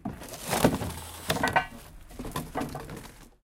A pile of garbage got flipped.